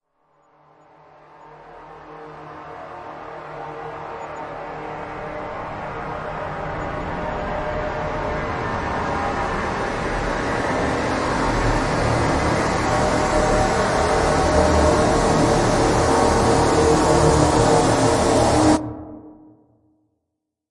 An epic cinematic rise made from a sound effect in FL Studio. I brought the sound into Audacity and added paulstretch and a bit of reverb to make it sound massive.
;) Thank you!
Ambiance, Ambience, Ambient, Atmosphere, Awesome, Cinematic, Dramatic, Epic, Film, Hit, Incredible, Loud, Movie, Reverb, Rise, Scary